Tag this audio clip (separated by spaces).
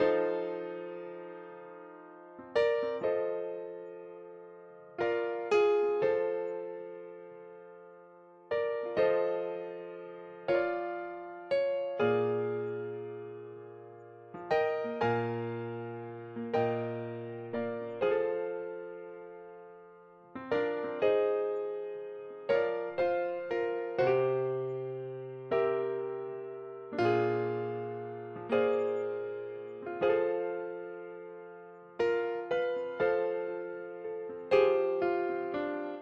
bpm Piano beat HearHear blues loop 120 rythm Fa Chord